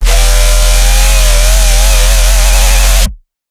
EXCISION VIBRATO BASS
This is te same sound of my excision bass version, on this one i included a lfo vibrato
I dont think this sound will be useful but is a kind of funny sound to make